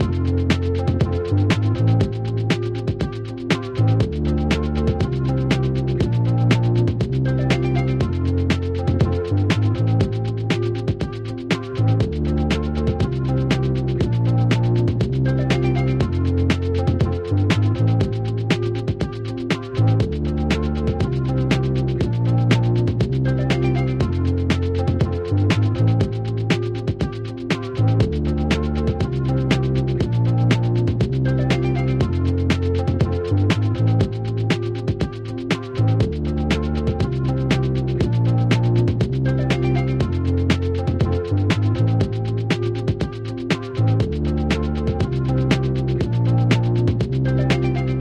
Tape No. R-S 5 (Lo-fi loop)
A looping lo-fi excerpt of Felineterror's song 'R-S 5'
There is alot going on under the hood here, everything from an analog bass exciter, feeding into a audio rectifier, creating that unique bass tone. A highpass filter, feeding into a delay. And then a tape emulator, featuring frequency loss, tape degradation and Wow'n'Flutter.
Other versions of this remix:
• No Tape Effect (Clean)
• ->With Tape Effect<-
• Since my ears can't hear any frequencies above 14kHz (Please remember to wear ear defenders around loud machinery! Thank you. <3), I can't hear tape hiss very clearly, and are afraid I might overdo the volume.
120 BPM | Original by Felineterror
Have fun, see you on the other side!
My favorite number is 581829 and ¾.
bass, remix, rhodes